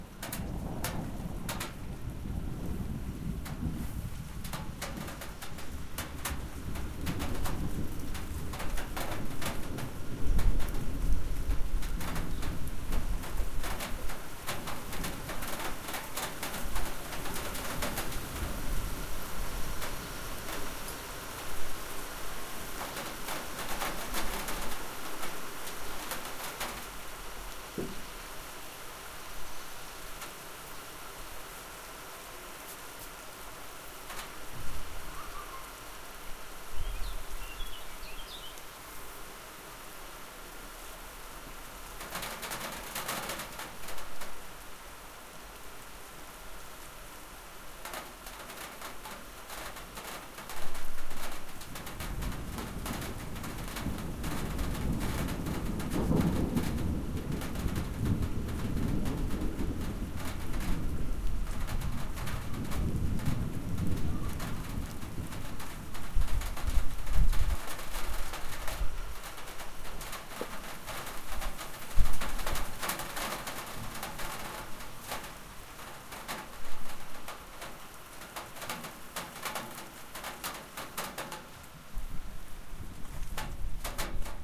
It started to rain
It starts to rain, the spring shower is gradually gaining strenght, drops of water falling on the tin window sill.